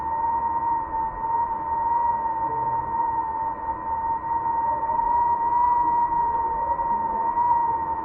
FX DOOR NOISE WHISTLING LOOP
This is the variable mid/high pitch sound of a metal door not properly closed in my college, making a whistling sound. There are very light sounds of people passing and chatting by the door. I used this sound in a group project as part of a night wind sound in a dark forest. It is looping. Recorded with a Tascam DR-40.